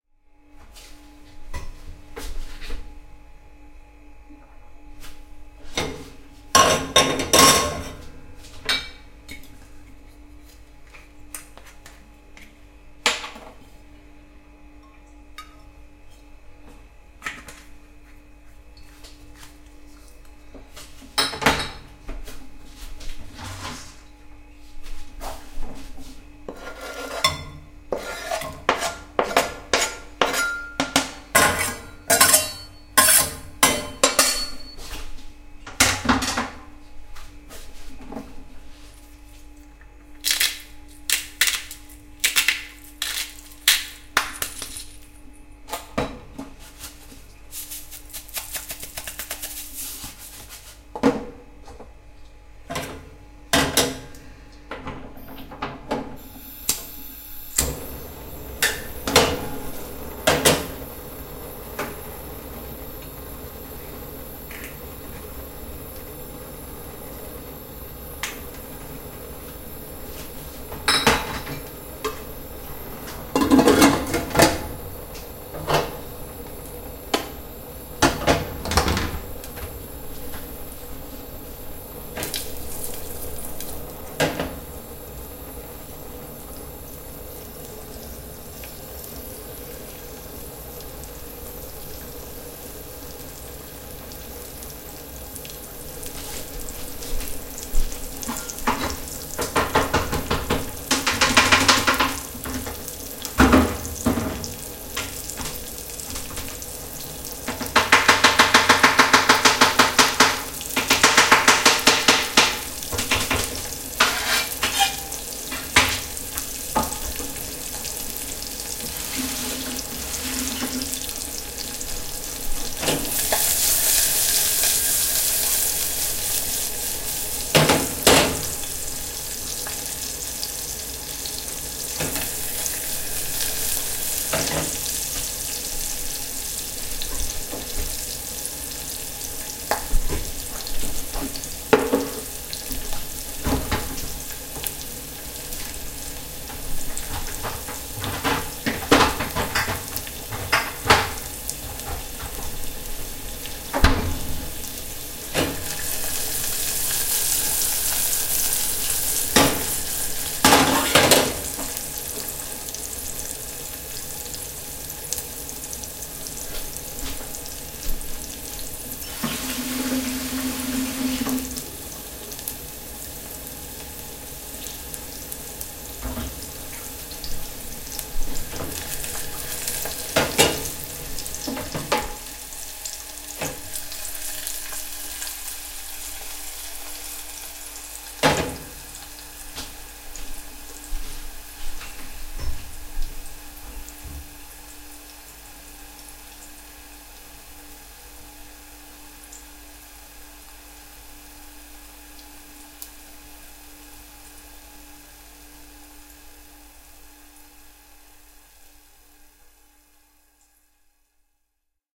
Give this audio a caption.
Kitchen Cooking Noises & Ambience
In my kitchen cooking
ambience, cook, cooking, kitchen